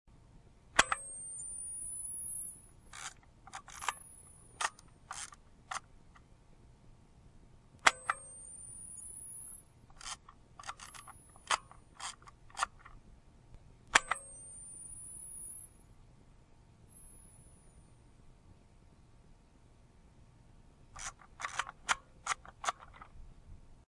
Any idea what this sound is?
A nice wide variety of foley effects for my 101 Sound FX Collection.